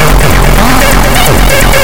FLoWerS 130bpm Oddity Loop 015
Another somewhat mangled loop made in ts404. Only minor editing in Audacity (ie. normalize, remove noise, compress).
resonance, experimental, loop, electro